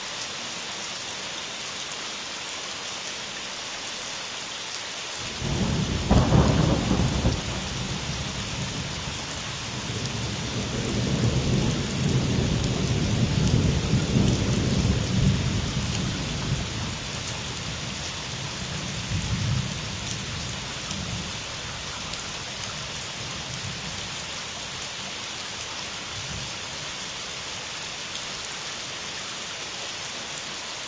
Rolling thunder recorded during heavy rain at Lago Trasimeno in Umbria, Italy
ambient, atmospheric, heavy-rain, italy, lightning, nature, rain, rolling-thunder, storm, thunder, thunder-storm, thunderstorm, weather